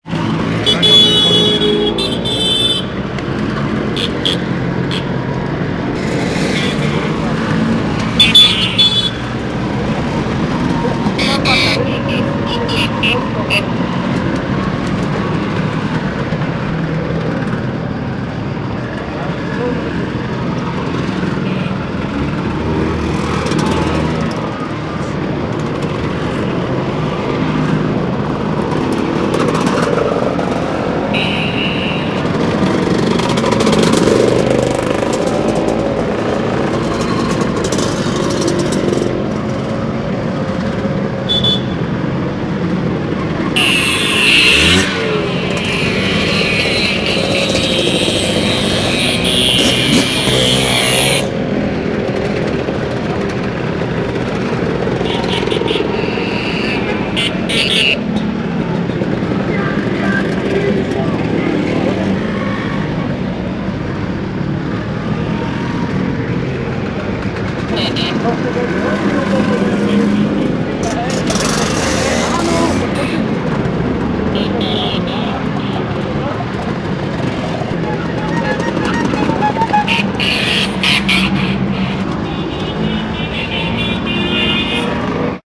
motorcycles
Recording from july 2011. I was in a computer camp in Ferrarra (italy). I was in the town with my friends when a lot of motorcycles came to the road. Recorded with Nokia N95.
Italy, horn, engine